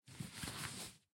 Clothes Rustling Movement 3 6
Acessories, Belt, Blanket, Body, Buckle, Cloth, Clothes, Clothing, Cotton, Design, Fabric, Foley, Handling, Leather, Movement, Natural, Nylon, Person, Recording, Running, Rustling, Shaking, Shirt, Shuffling, Soft, Sound, Sweater, Textiles, Trousers, Walking